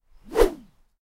Bamboo Swing, B15
Raw audio of me swinging bamboo close to the recorder. I originally recorded these for use in a video game. The 'B' swings are slightly slower.
An example of how you might credit is by putting this in the description/credits:
The sound was recorded using a "H1 Zoom recorder" on 18th February 2017.
bamboo, woosh, swinging, whoosh, swing, swish, whooshing